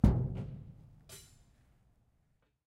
Metal container 2 normal
A hit on a metal container